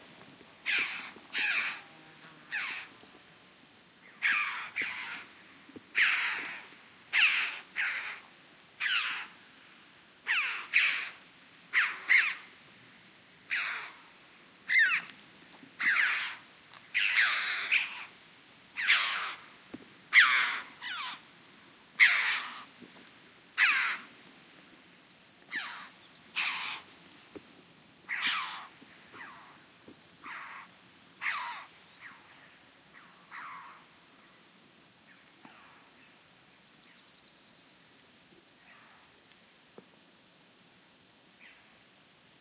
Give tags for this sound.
birds
corvids
nature
south-spain
ambiance
Pyrrhocorax-pyrrhocorax
mountains
forest
field-recording
Red-billed-Chough

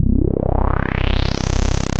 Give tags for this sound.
multisample
resonance
sweep
synth

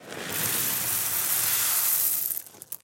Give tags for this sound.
bar
coffee
espresso
field-recording
machine

